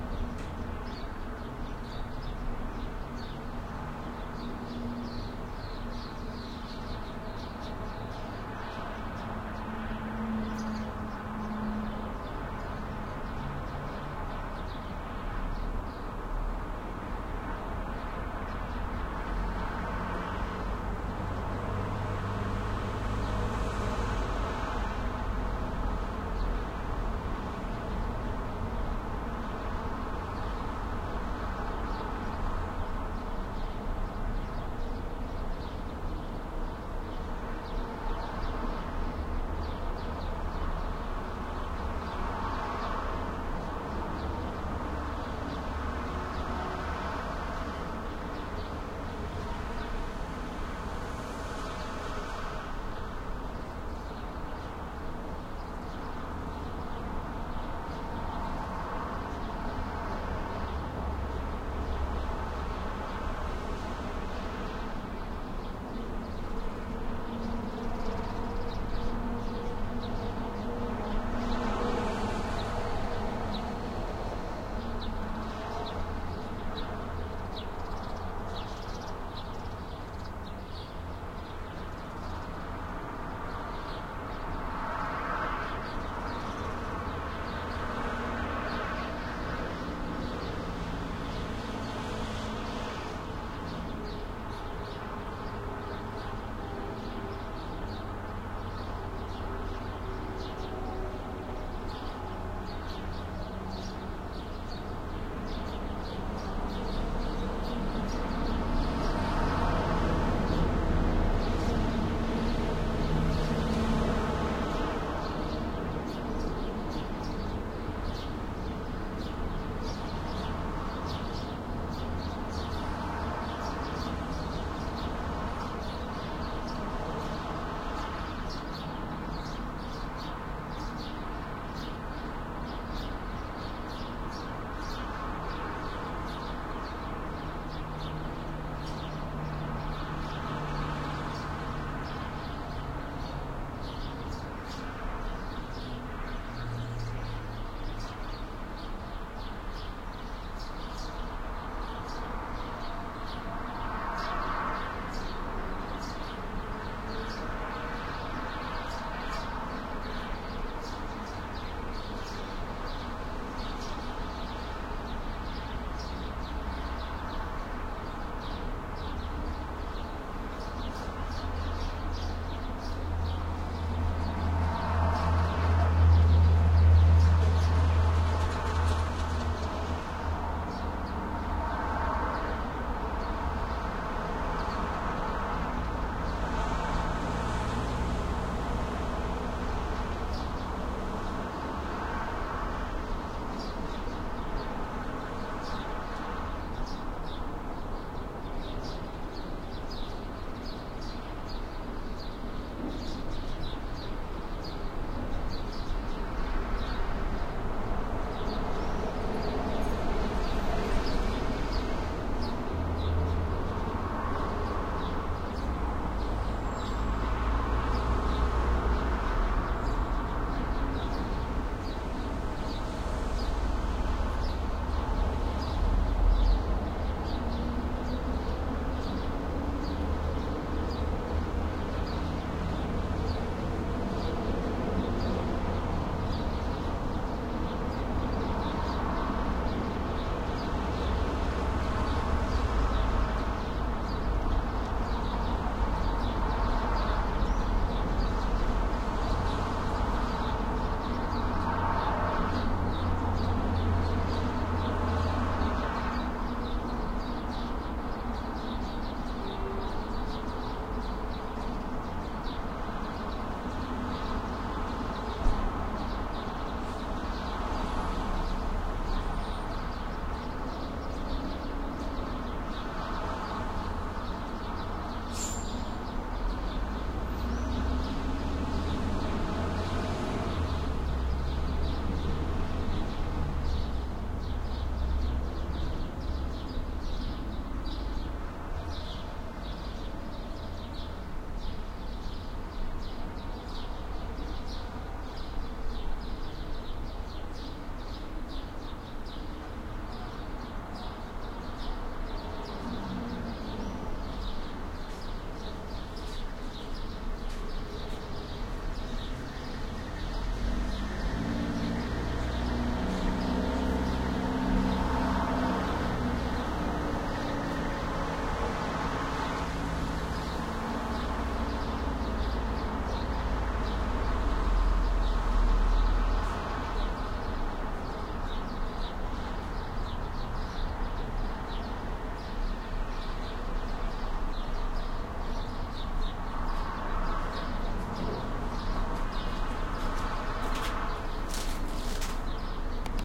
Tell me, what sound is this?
City ambience Cyprus Limassol 7A.M. hotel balcony OMNI mic
Recording made on 7A.M. at the hotel balcony on a fourth floor, facing outside towards the B1 road of Limassol, Cyprus.
Made with Roland R-26 built-in OMNI mics.
ambience, birds, city, Cyprus, field-recording, Limassol, noise, soundscape, street, traffic